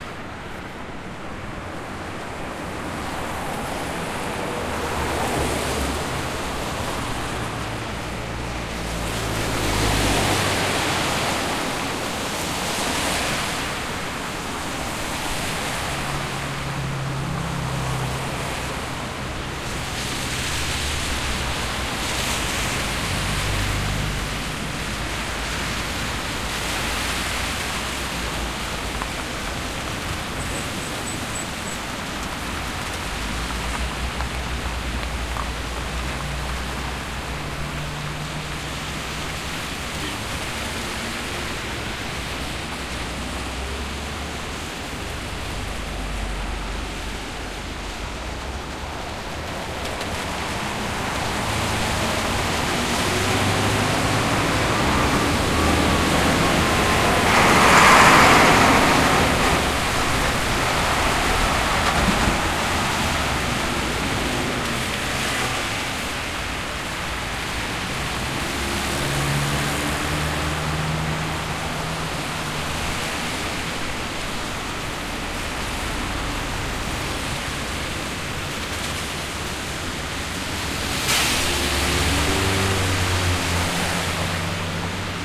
сars driving dirt 2
Cars driving on a dirt. Early spring. Entrance to the Oktyabrskiy bridge.
Recorded 31-03-2013.
XY-stereo.
Tascam DR-40, deadcat